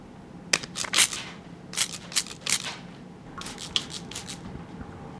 spraying water from a spray bottle
water-gun, shoot, water, spray-bottle, squirt